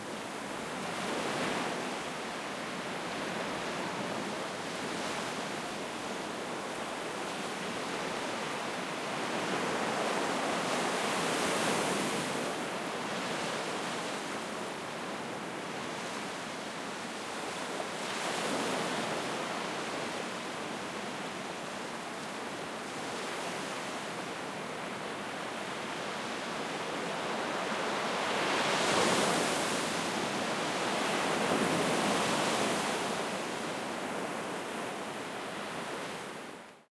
seashore
h4n X/Y